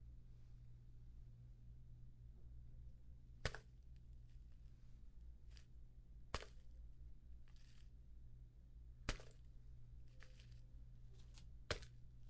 29-ground sound
floor, ground, dust